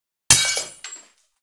The unmistakable sound of a plate breaking on concrete
concrete, breaking